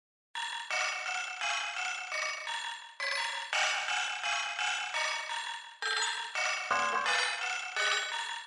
Dissonance-Phone-1-Tanya v
chaos, for-animation, sfx, phone, dissonance